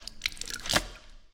Wet Impact (2)
Impact of something wet, could also be used for splatter effect
Gore, Impact, Splatter, Wet